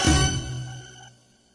Selecting right answer - speed 4
correct, right, fast, selection, stab, game